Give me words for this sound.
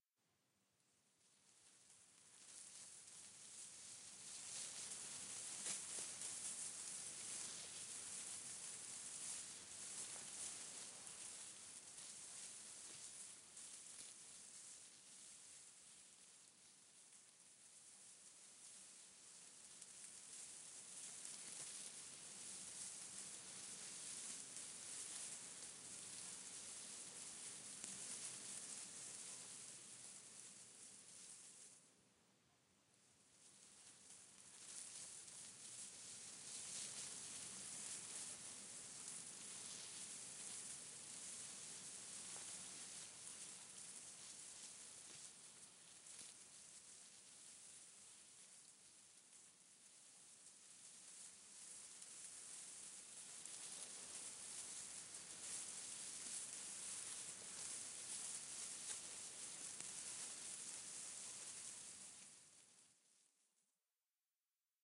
Breeze: a most difficult sound to record!! Sound of the breeze through the pines.
wind, forest-breeze, forest, tree, nature, wind-in-trees, leaves, blowing, breeze-blowing, trees-blowing, breezy, breeze, wind-blowing, trees, windy, gusts, gust